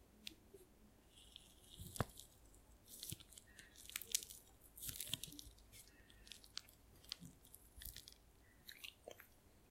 banana crushing
Smacking of a crushed banana (closeup recording)
banana, Close, crushing, recording, smack, smacking